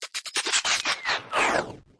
Mecha - Effect - Elements - Mechanism 01
creature, engine, futuristic, movement, sound-design